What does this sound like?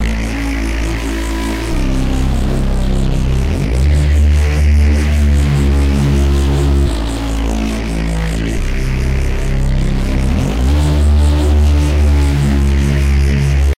That reese is very distorted on low harmonics. I put some phaser too, because with phaser, sounds less raw.